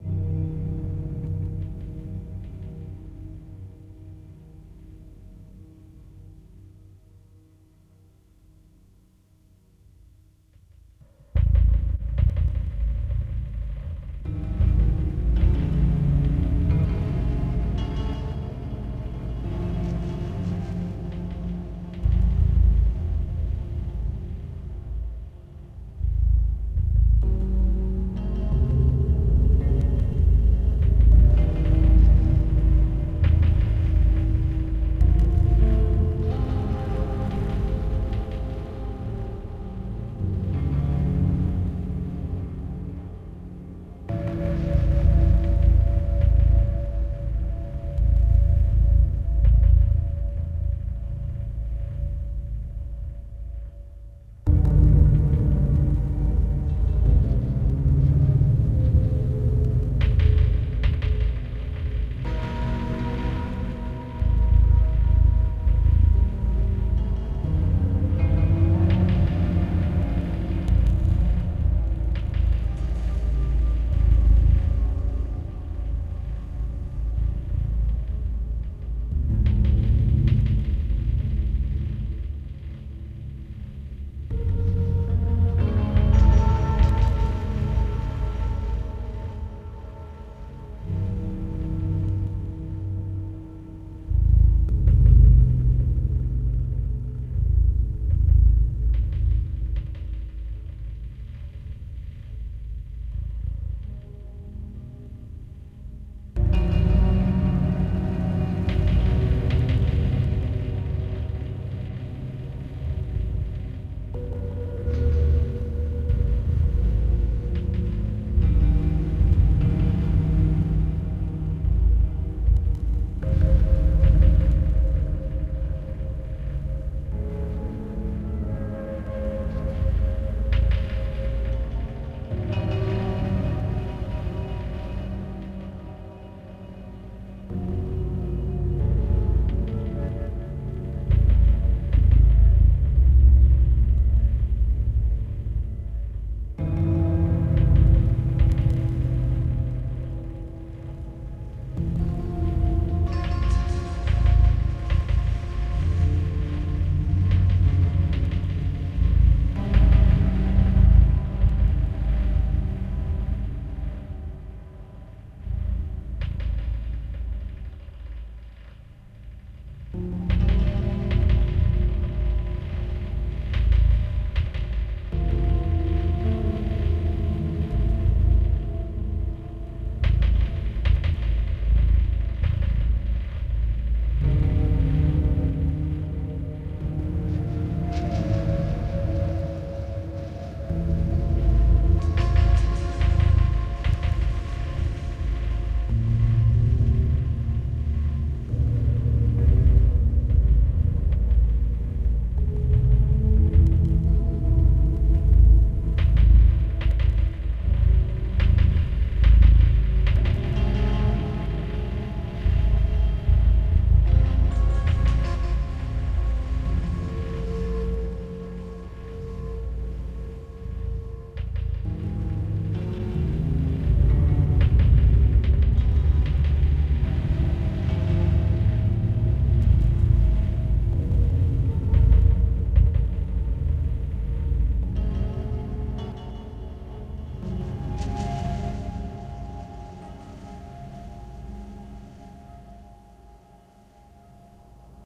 8tr Tape Sounds.